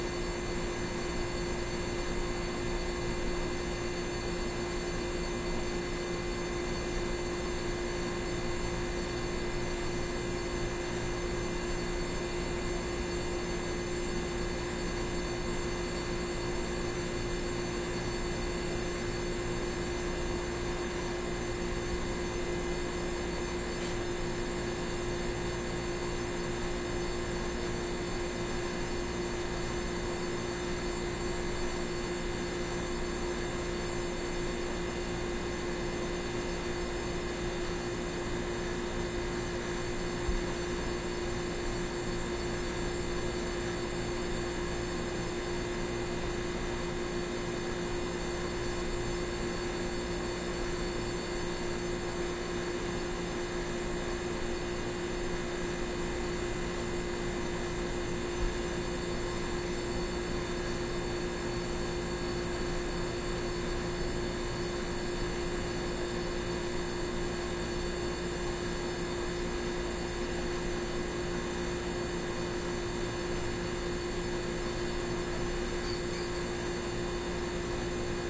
room tone fridge apartment kitchen2 farther
room tone fridge apartment kitchen farther
kitchen, tone, apartment, room, fridge